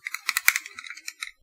rattle and clacks
shaking my headphones